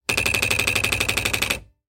typewriter electric spacebar several times
Several sounds of the spacebar of an electric typewriter.
Recorded with the Zoom H4n and the Rode NTG-3.
repeatedly, type, key, typewriter, electric, spacebar, typing, several